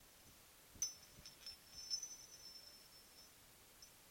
timbre super suave